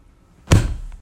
Ground hit
sound of something hitting ground
hard, ground